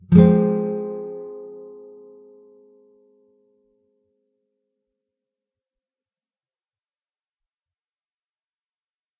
Fmin 3strs
F minor. A (5th) string 8th fret, D (4th) string 6th fret, G (3rd) string 5th fret. If any of these samples have any errors or faults, please tell me.